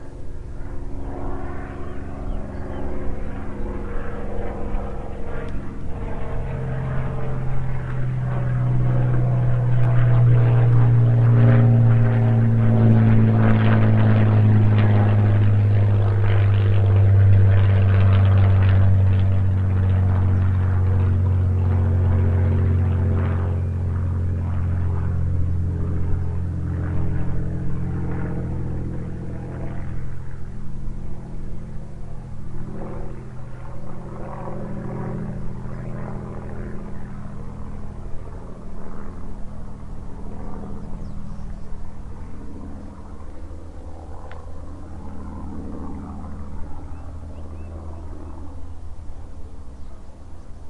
A (slow moving ? ) spitfire flying overhead. Some bird noise in the recording, but very good low end.
No post processing done and recorded with Microtrack2 in-built mic with a Windjammer.